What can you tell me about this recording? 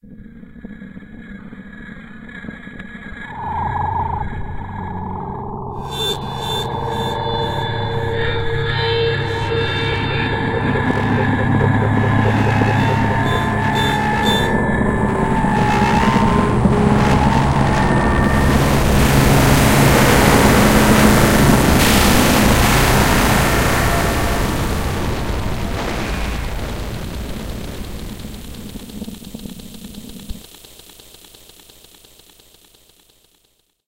The Real Sound of Flesh Becoming Metal FunkyM Hello Demix
funky, muskrat, soundscape